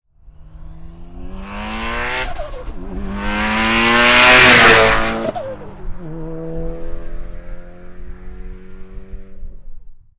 Subaru Impreza STI near drive around to the right
impreza, near, Subaru